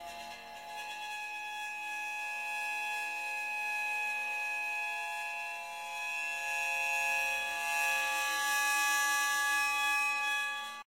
drone8 bowed cymbal2
Yet another sound of a bowed cymbal.
bowed, cymbal, drone, metal, squeak